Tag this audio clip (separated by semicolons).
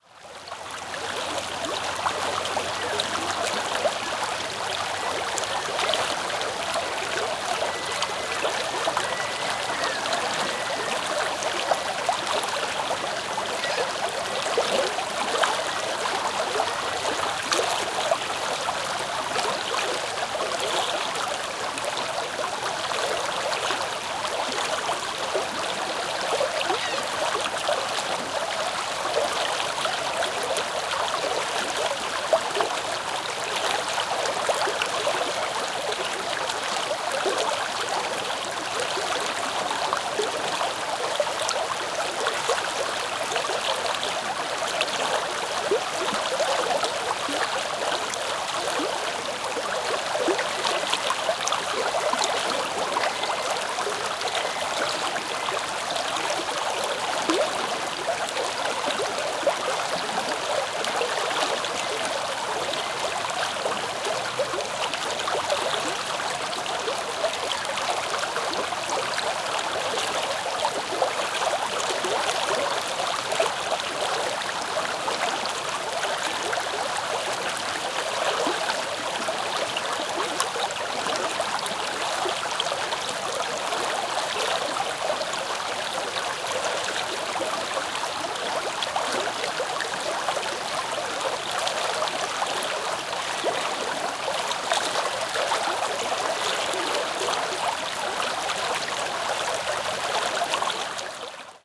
water; field-recording